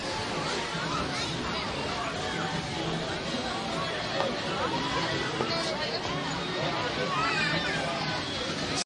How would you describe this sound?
wildwood moreyspierthursday2
Getting tickets on Morey's Pier in Wildwood, NJ recorded with DS-40 and edited in Wavosaur.